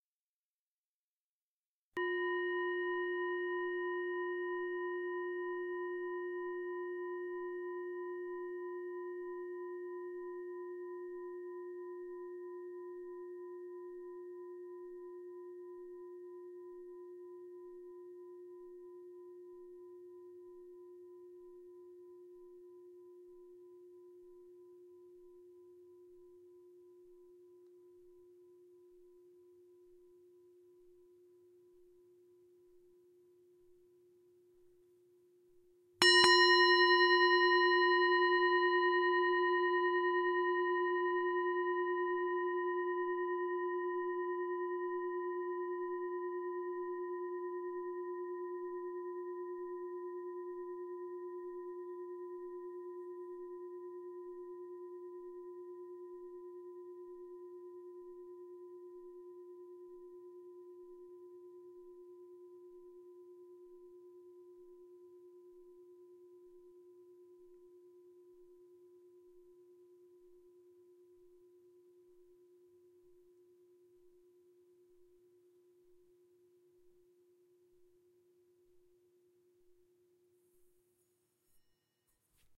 Himalayan Singing Bowl #1A
Sound sample of antique singing bowl from Nepal in my collection, played and recorded by myself. Processing done in Audacity; mic is Zoom H4N.
bell, bowl, brass, bronze, chime, clang, ding, drone, gong, harmonic, hit, meditation, metal, metallic, percussion, ring, singing-bowl, strike, tibetan, tibetan-bowl, ting